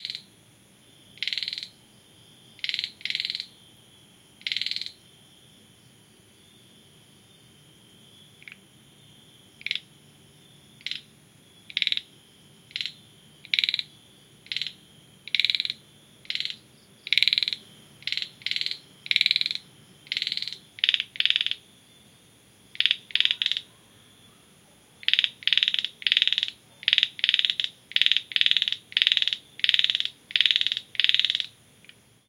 asian frog
I was recorded this sample in Thailand, Koh Samui, Mae Nam, 24 November 2010;
Recorded with ZOOM H4N;
asia, bugs, crack, crunch, field-recording, forest, frogs, jungle, nature, night, thailand